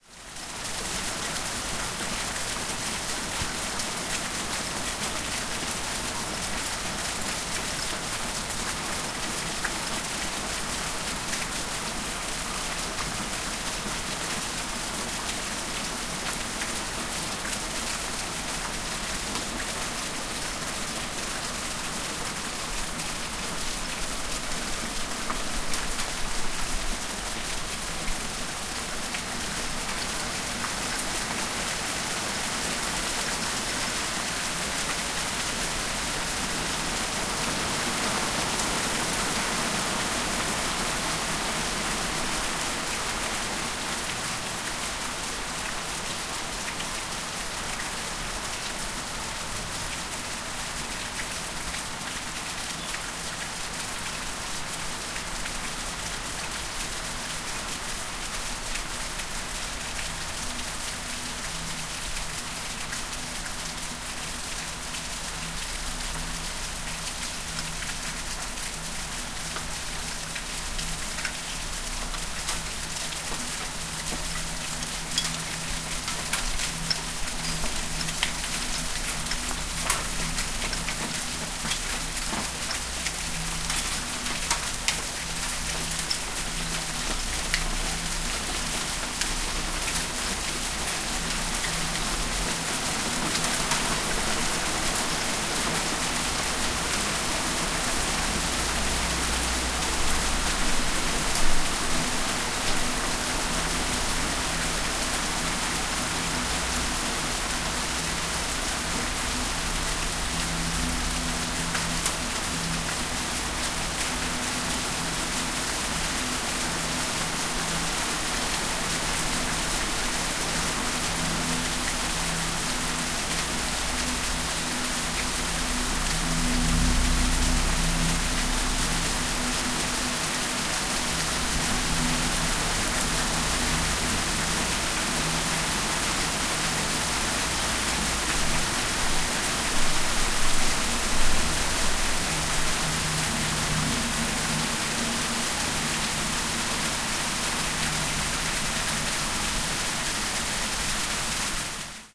Heavy rain getting stronger under a roof
Recorded from a window of a farmhouse. You hear the heavy rain coming down in the garden, water dripping from the roof and the rain getting stronger. In the end there is a weird sound, maybe an airplane.
Recorded in Gasel, Switzerland.
raining, field-recording, drops, raindrops, atmosphere, dripping, ambiance, storm, ambient, soundscape, nature